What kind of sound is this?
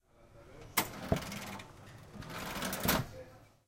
This sound was recorded in the UPF's bar. It was recorded using a Zoom H2 portable recorder, placing the recorder next to the cash box.
We can hear the sound of the box opening first and then closing.
bar, campus-upf, cash, money, UPF-CS13